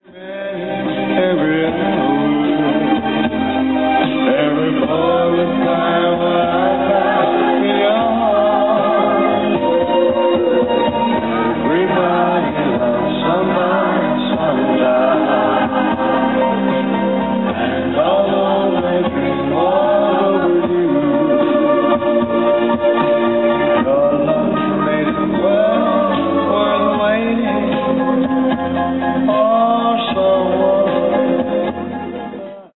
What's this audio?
20.35 everybody loves 150510
15.05.2010: about 20.35. recording made by my friend from the UK Paul Vickers. It had been recorded on the Kaponiera Rotary in Poznan where the Motorization Museum is located.
poland, field-recording, line, rotary, queue, roundabout, traffic-circle, poznan, song